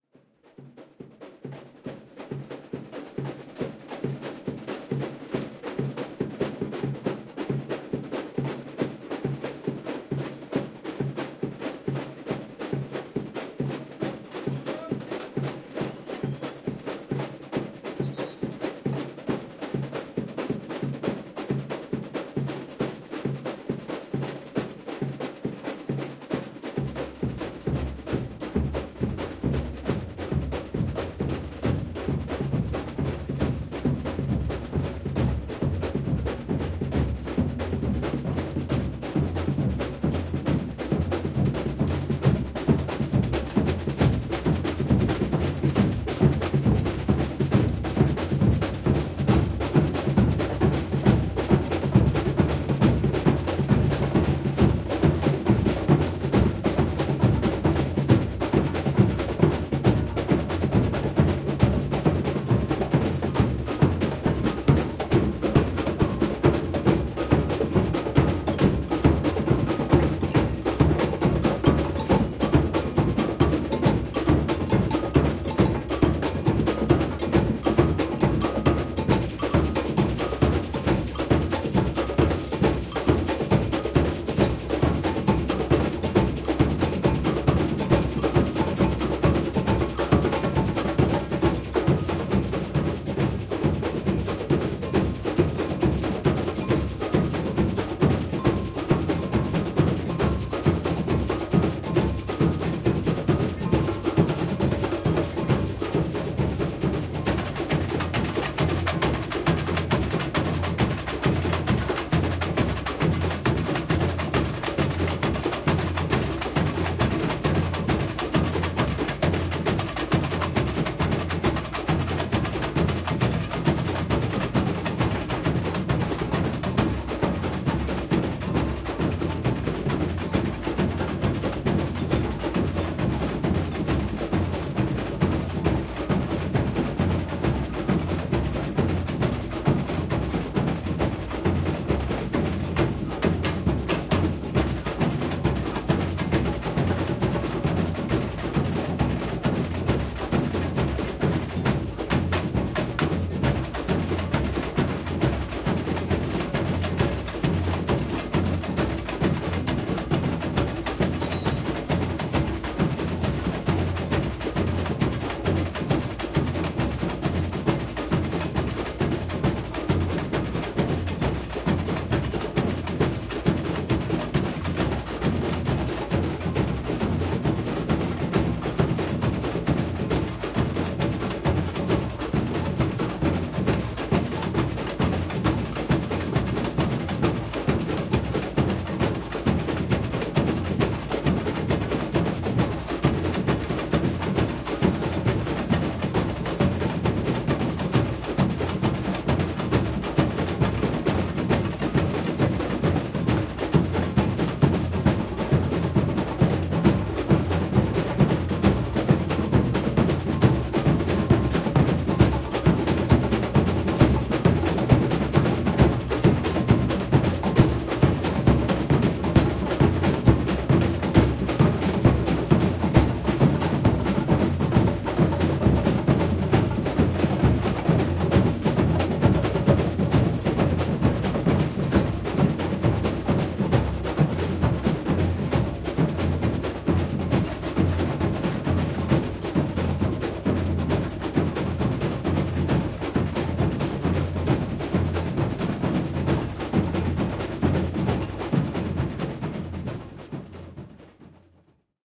110611-002 bateria rehearsal mocidade style
Samba batucada rehearsal at the Berlin Carnival of Cultures June 2011 (Karneval der Kulturen). The band is playing the rhythm style of the Samba school of Mocidade Independente de Padre Miguel from Rio de Janeiro, Brazil. Zoom H4n
bateria, batucada, berlin, bloco, brazil, caixa, carnaval, carnival, drum, escola, escola-de-samba, karneval, mocidade, percussion, repinique, rio, rio-de-janeiro, samba, samba-groove, samba-rhythm, samba-school